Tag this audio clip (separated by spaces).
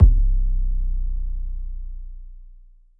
drum hits idm kit noise